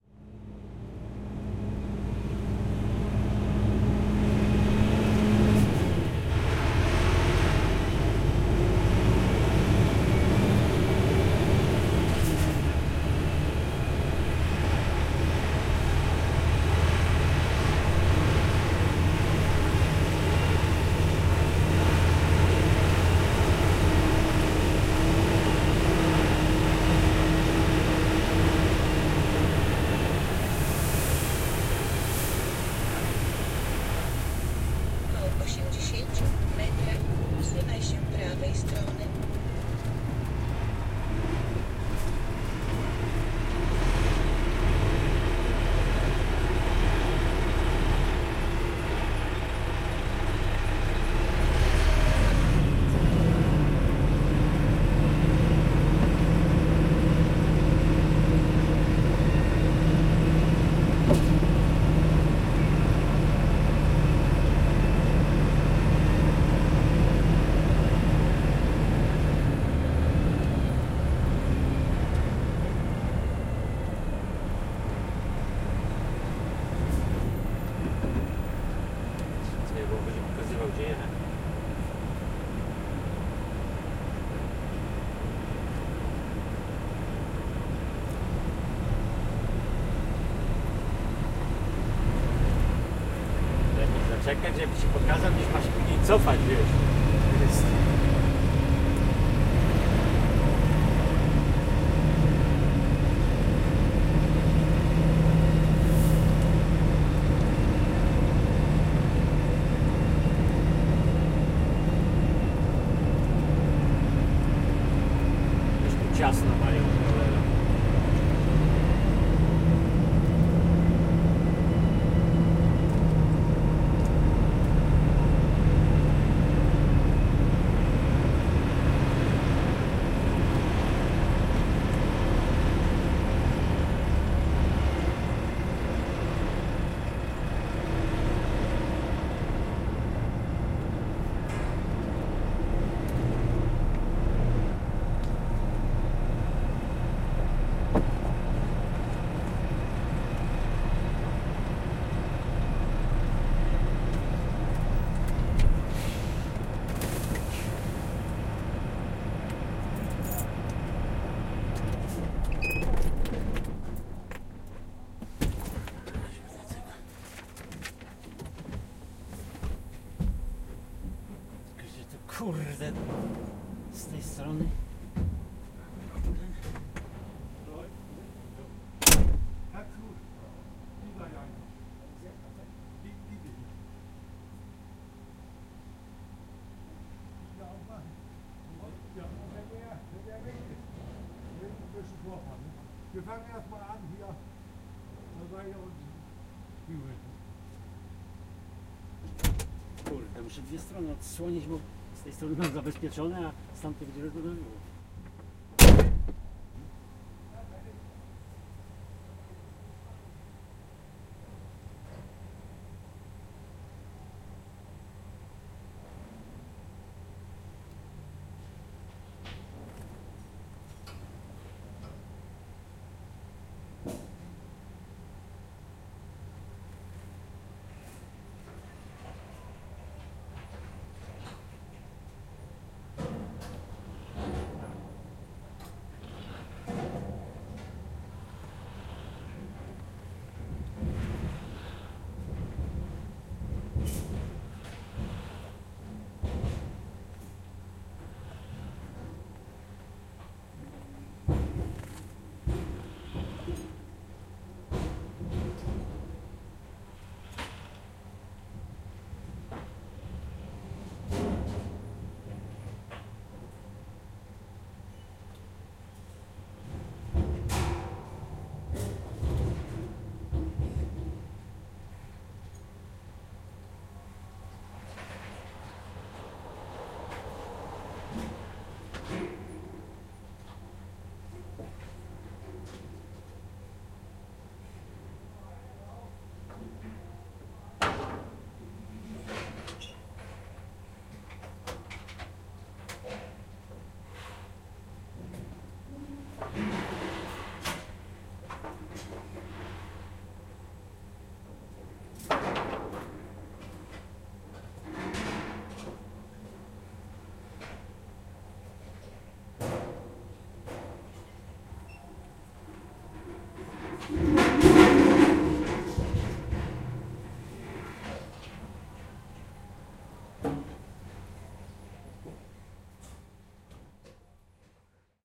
110802-unload blackcurrent
02.08.2011: third day of the research project about truck drivers culture. Neuenkirchen in Germany. Fruit-processing plant (factory producing fruit concentrates). Entry at the back of the factory. Sound of the truck engine. Truck driver and navigation voices.
silos, engine, refrigerating-machine, germany, field-recording, forklift, truck, buzz, drone, factory, voice, navigation, neuenkirchen, noise